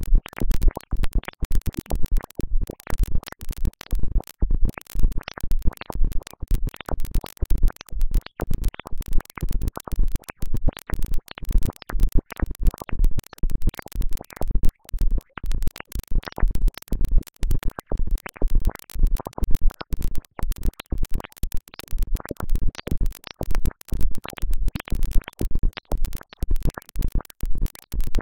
Boiling Liquid
Synthesized sound that reminds of boiling some kind of liquid.